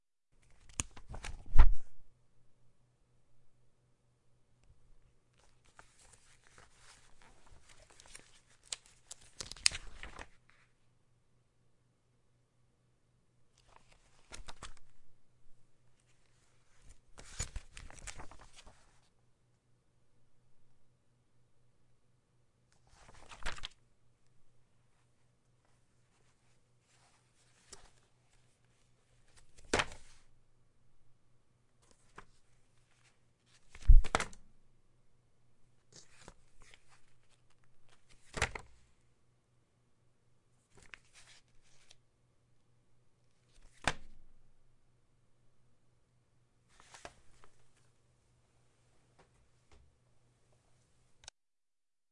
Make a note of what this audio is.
Magazine Opening & Closing d7s
A magazine opening and closing and being tossed on the desk
Recorded through an SSL 2+ through pencil condenser
Closing, Magazine, Opening